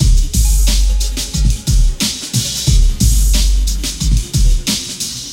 Synths & Amen Break 2 - (90bpm)
Pack: Amen Drum kit by VEXST
Synth Loop 7 - (90bpm)
Synth Loop 6 - (90bpm)